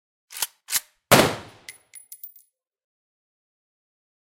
ak 47 - single shot
ak 47 single shot
It is my recording, used Zoom h2n.